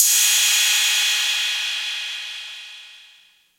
808 cymbal (or open hihat) sent through through a Joe Meek optical compressor. The analog processing filled out the sound in a nice way.